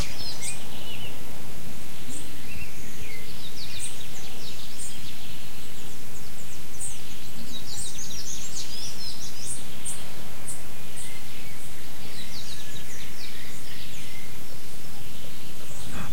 morning-birds short05
Recording taken in November 2011, at a inn in Ilha Grande, Rio de Janeiro, Brazil. Birds singing, recorded from the window of the room where I stayed, using a Zoom H4n portable recorder.
field-recording, birds, ilha-grande, morning, rio-de-janeiro, brazil, bird